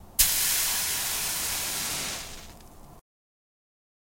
Single drop of water hitting a red hot frying pan + sizzle for a moment.
Recorded with an Audio Technica ShotGun Mic.
This is my first set of many recordings I plan to upload. In the future, I'll be more conscious of subtle sounds creeping in through the open window ;) The extraneous sounds in these recordings are minimal background noise I noticed after the recording. I'll search for tips on recording w/ shotgun mic and shoot for more pure sound for next upload.